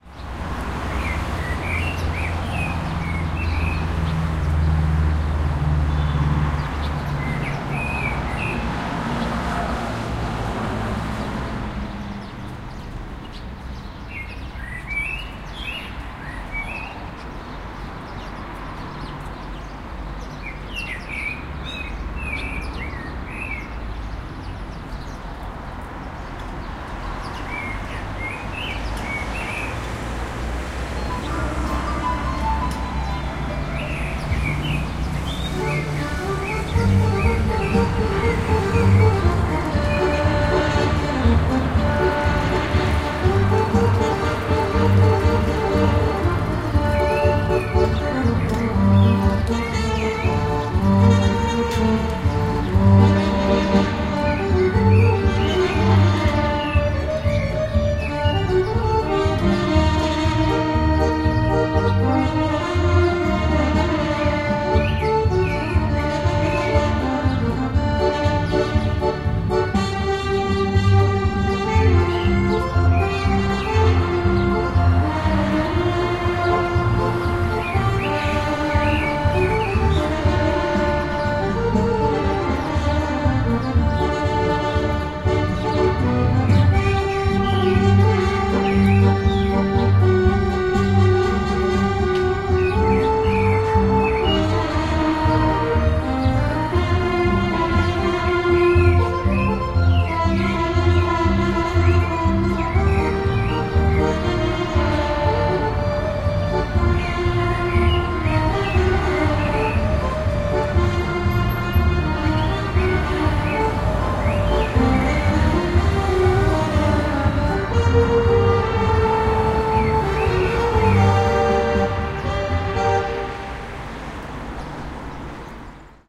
0202 Birds and El gitano la cabra y la trompeta
Birds and gipsy street band. Traffic.
20120324